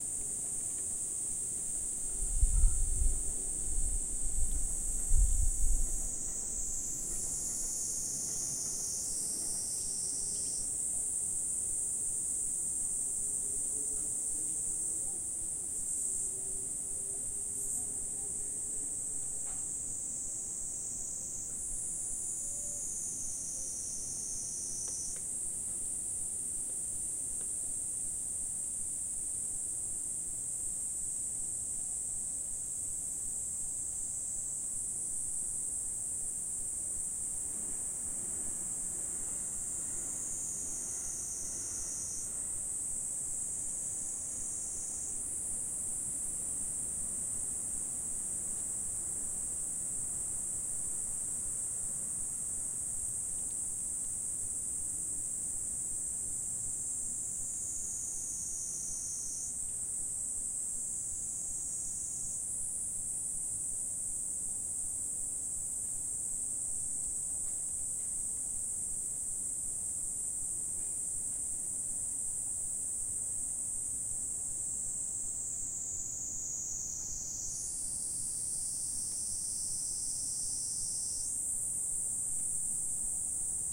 Japanese Cicadas, recorded near Fushimi Inari, Kyoto, Japan. A distant railroad crossing can also be perceived.
Recorded with a Zoom H1.